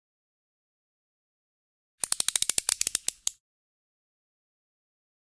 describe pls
3-1 Alien monster small
CZ, Czech, Panska